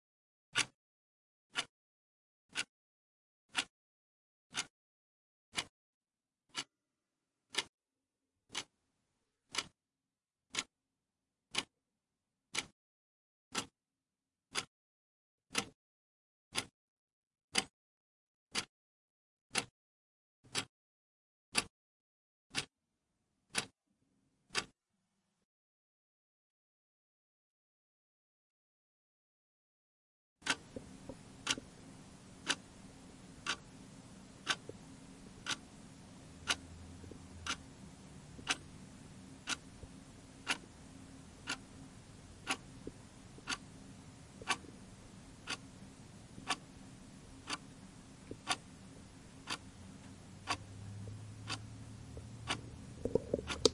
Ticking Clock 1
A quick recording of a tick from a clock in my house for foley. Recorded on the zoom H5 stereo mic. I cleaned up the audio and it is ready to be mixed into your work! enjoy!
clean, clear, clock, close, denoised, edited, field-recording, foley, h5, high, loud, quality, sound, stereo, tick, ticking, up, zoom, zoom-h5